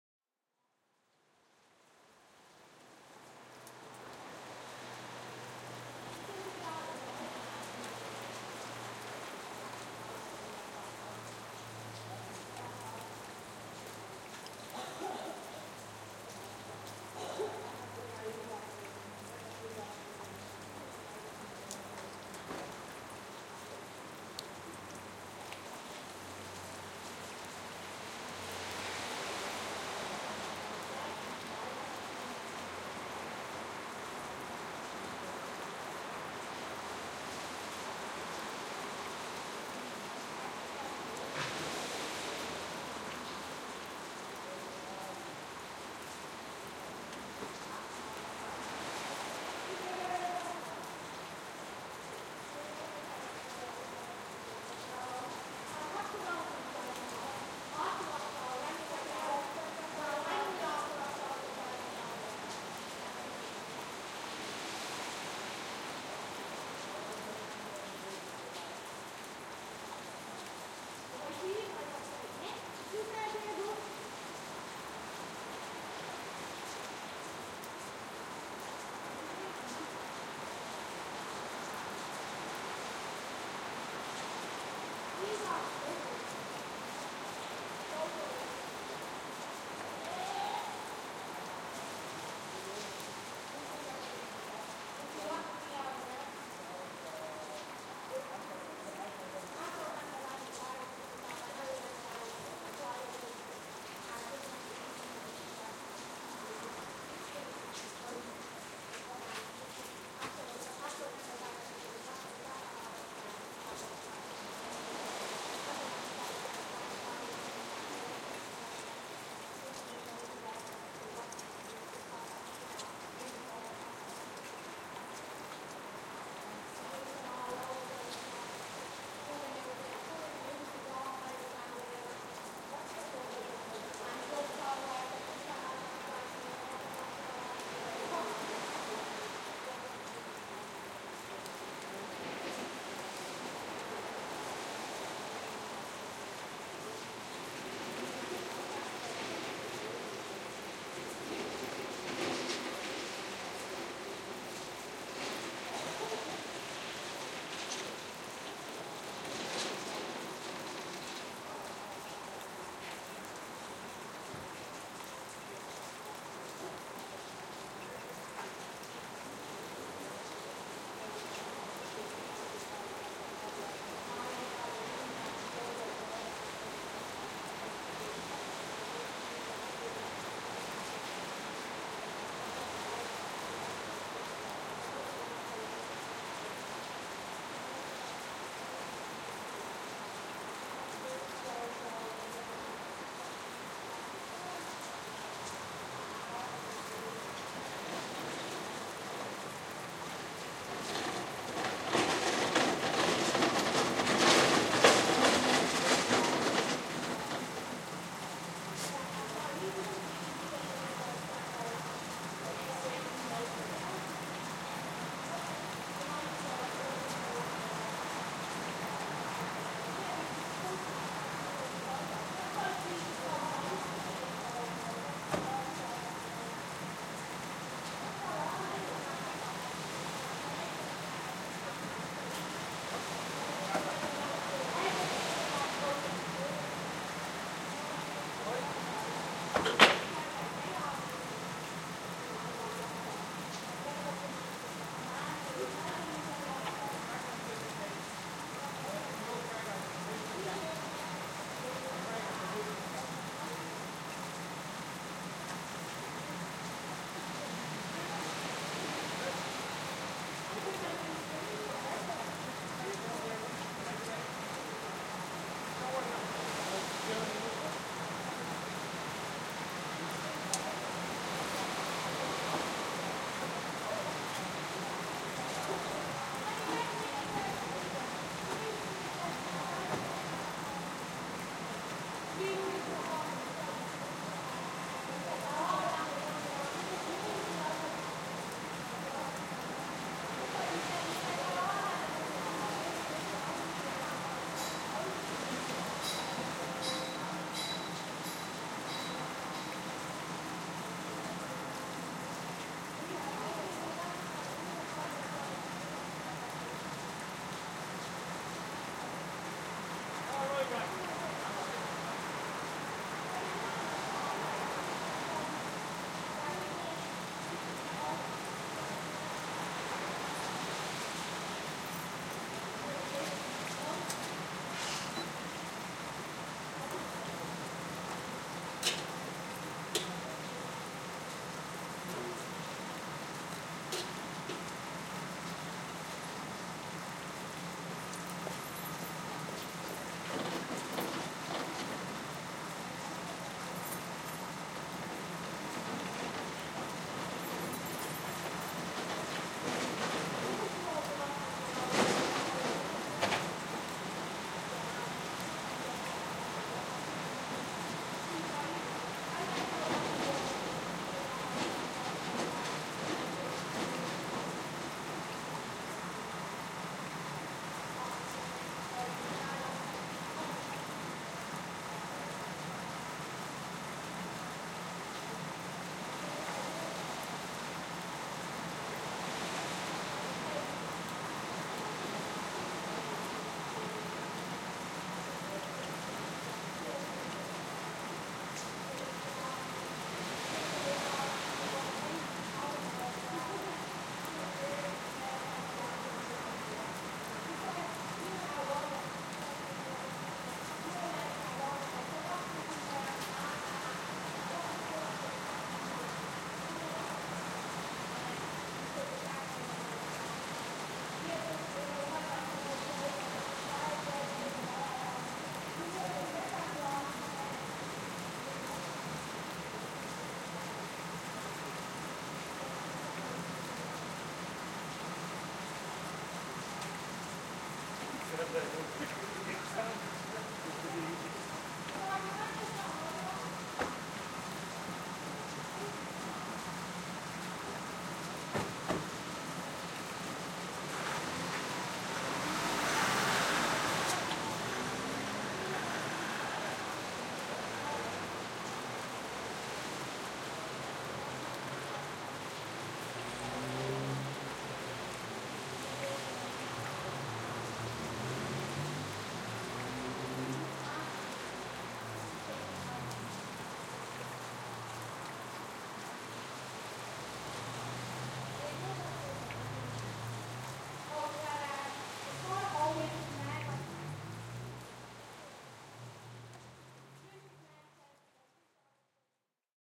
Hanover Lane Rain
Ambience of Dublin City Centre during a heavy rain shower. Walla of 'locals' coming from nearby tunnel. Jeep and trailer arrive halfway through.
Recorded with Rode NT-4 and Marantz PMD-661. Processed in Izotope RX.
Cars, Chatter, Dublin, Local, Noise, Rain, Rumble, Sounds, Walla, Weather